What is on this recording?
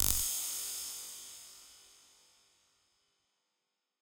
etl Ant Explodes 24-96
1 piece of spaghetti, broken, and processed. Sounds electric.
electric, spaghetti